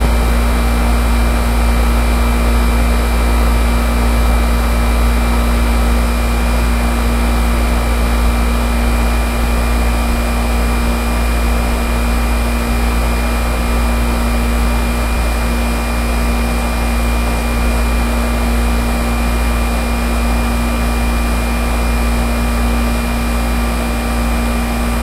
Air Conditioning Unit 1
Outdoor air conditioning unit recorded from within a sock to guard against wind.